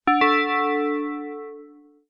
A message alert tone for a computer or cell phone.